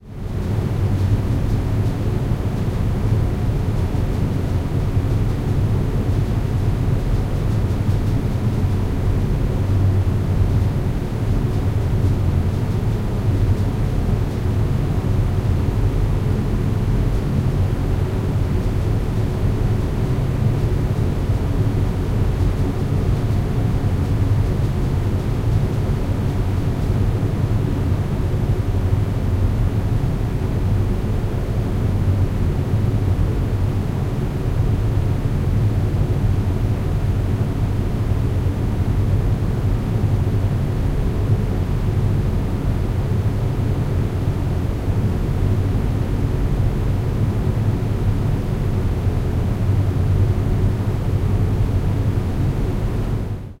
Roomtone Hallway upstairs Spinnerij Front
Front recording of surround room tone recording.